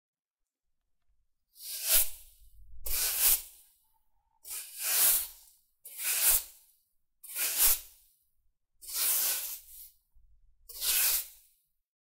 Zippo style lighter in usage
CZ, Czech, Lighter, Pansk, Panska, Village